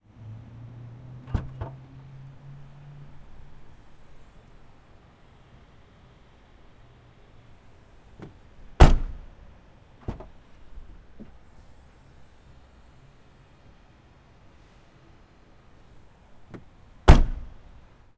Exterior Prius back hatch open close
A series of hatch back opens and closes, some featuring the hydraulic cylinder.